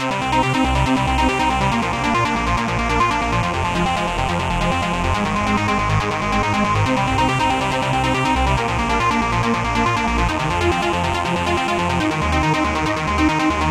Pad and synth arpeggio with flanger effect.
bass, distorted, trance, progression, sequence, melody, techno, phase, flange, strings, 140-bpm, synth, hard, beat, distortion, pad